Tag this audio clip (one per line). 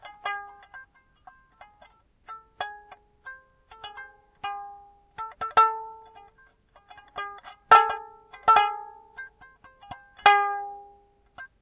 string
tinkle
childs
strum
jangle